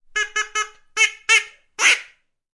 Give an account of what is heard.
FEMALE DUCK - 1
Sound of female duck made with bird call (decoy). Sound recorded with a ZOOM H4N Pro.
Son d’une cane fait avec un appeau. Son enregistré avec un ZOOM H4N Pro.
animal, animals, animaux, appeau, bird, bird-call, birds, call, calling, canard, cane, cri, decoy, duck, ducks, female-duck, nature, oiseau, quack